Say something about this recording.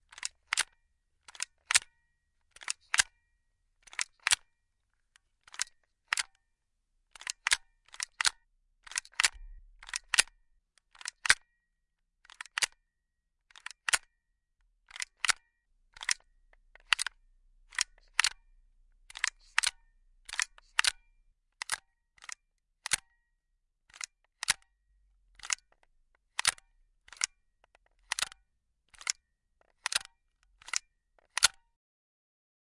reloading gun or maybe not
Maybe reloading a gun or not?
pistol, military, reloading, gun, weapon